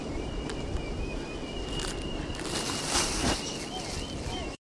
newjersey OC beachsteel mono
10th Street beach in Ocean City recorded with DS-40 and edited and Wavoaur. The whistling sound in the background is from the kite string tied to sign nearby.
field-recording, ocean-city, beach